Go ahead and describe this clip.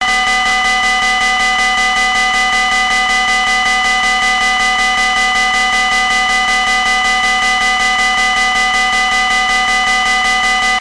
This is the digital Belgian railroad crossing alarm sound.
The Belgian railroad company Infrabel replaced all the mechanical bells at railroad crossings with a pulsating digital sound that they claim to be more efficient and safe then the previous analog bell sound. Infrabel made this recording available online as part of a media campaign about the replacement.
infrabel, unidirectional, barrier, crossing, belgium, sign, electronic, nmbs, train, railroad, sncf, pulse, digital